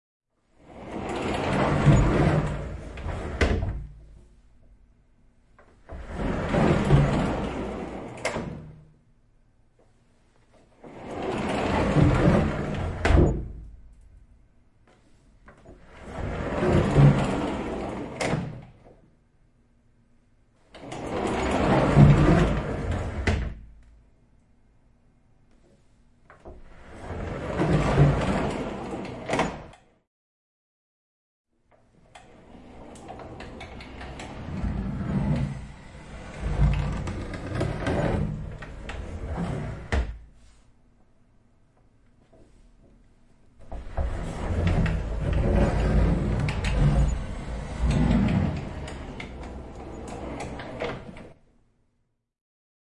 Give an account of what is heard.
Puinen liukuovi ravintolasalissa rullaa auki ja kiinni. Erilaisia.
Paikka/Place: Suomi / Finland / Kirkkonummi, Hvitträsk
Aika/Date: 16.10.1989